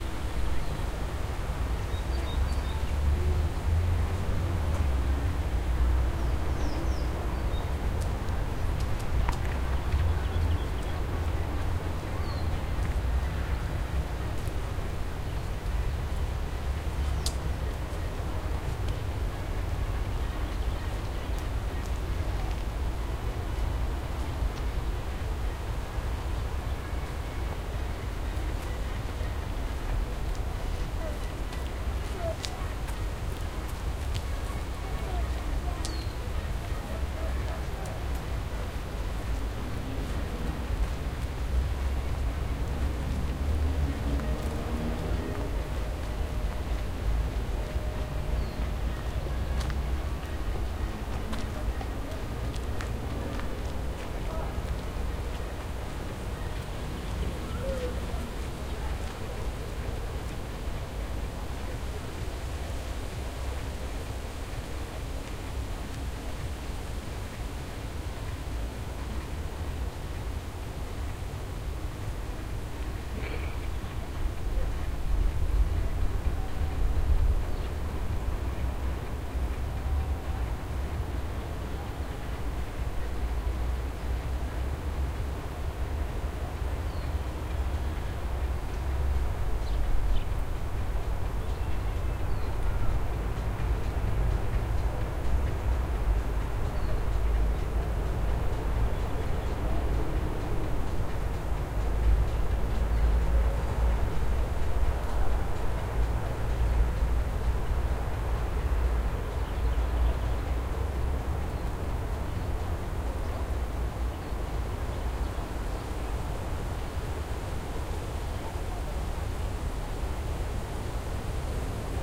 lake 4 3ch
Birds on small lake in the city. Tweet. Noise of leaves. Music from cafe placed near. Rumble of city in the background.
Recorded: 16-06-2013.
XY-stereo + central channel variant.
Tascam DR-40 internal mic + Pro Audio TM-60
It isn't 2.1 sound! It's stereo + central channel which recorded by super-directional microphone.
atmosphere, soundscape, Omsk, field-recording, lake, trees, background, leaves, atmo, background-sound, noise, town, tweet, ambiance, rumble, wings, ambient, ambience, city, Russia